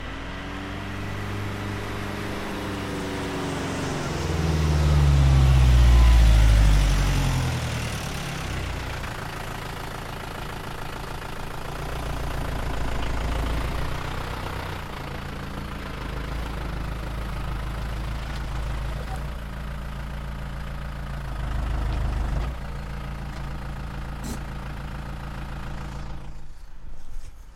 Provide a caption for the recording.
Renault Master 28dTi Exterior Reverse And Idle Mono
This sound effect was recorded with high quality sound equipment and comes from a sound library called Renault Master 2.8 dTi which is pack of 113 high quality audio files with a total length of 88 minutes. In this library you'll find various engine sounds recorded onboard and from exterior perspectives, along with foley and other sound effects.